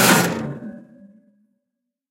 Recording of an air conditioner being hit with a soft stick. Recording made with an Olympus LS-11.